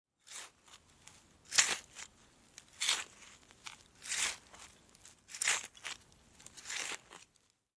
diggind in ground with the shovel
Panska, CZ, Czech